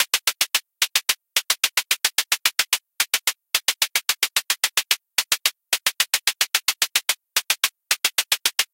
Tight hi-hats in a 16th-note pattern.
hihat, drumloop, 110bpm